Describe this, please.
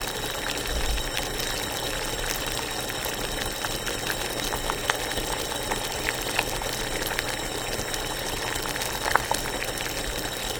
I need a specific water boiling sound for my movie Airborne Death and this is that sound.
Water-boiling, moisture, boil, steam, Water, pot, heat, stove